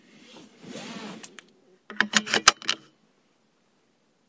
20150712 car.seat.belt.click.02
Someone fasten car seat belt. Shure WL183 into Fel preamp, PCM M10 recorder